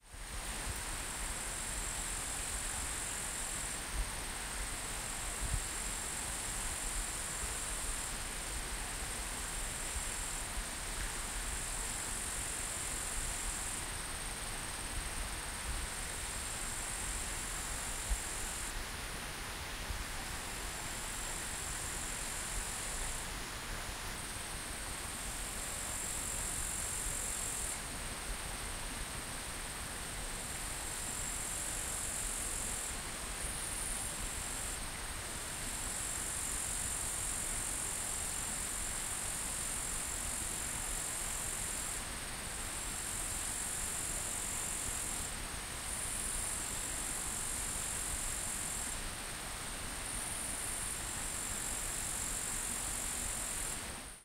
forest river grills waldviertel austria
A recording in a forest of Austria. You hear a small river in middle distance, some grills and other insects. Hope you like it :)
Birds, Wood, forest, austria, Nature, River, Field-Recording, Stereo, Grills, Soundscape, zoom-H1, waldviertel